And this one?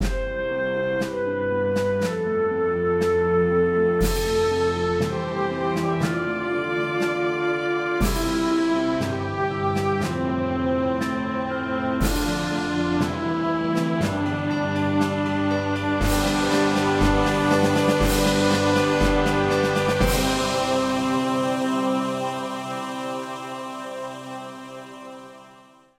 Emotive film music
Small orchestral theme for movie music.
Best regards!
emotional, emotive, epic, film, music, orchestral, romantic